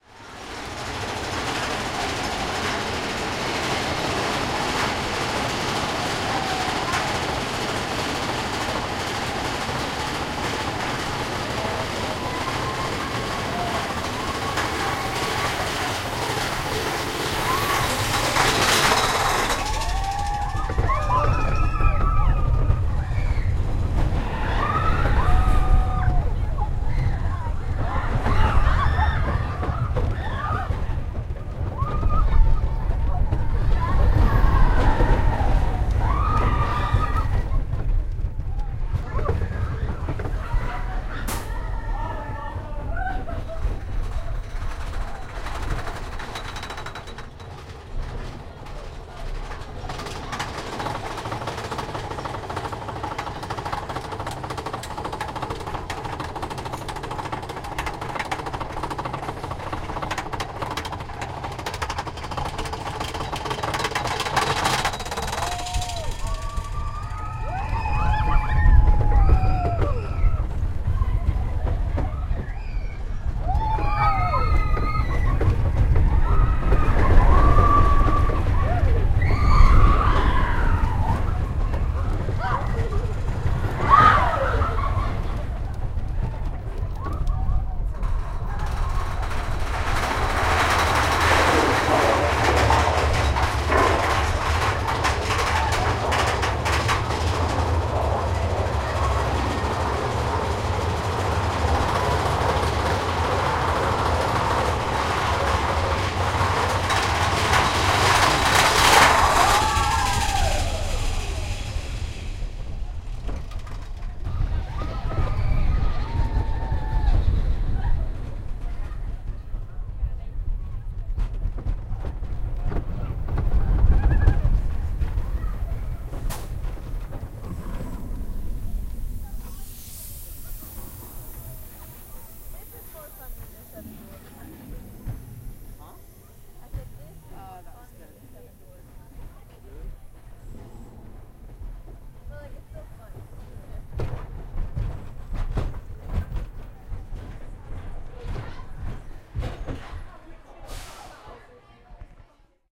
Disney's Big Thunder Mountain Railroad, A
An on-ride recording of Disney's "Big Thunder Mountain Railroad" ride at Disney's "Magic Kingdom". I have cut out all the segments of the ride that had sound designed elements (e.g. bats squeaking in the cave, sounds of goats, and sounds of mining operations etc.). What's left are the mechanical sounds and reactions of the people on the ride.
An example of how you might credit is by putting this in the description/credits:
The sound was recorded using a "H1 Zoom recorder" on 7th August 2017.
big, coaster, disney, mountain, railroad, ride, roller, rollercoaster, thunder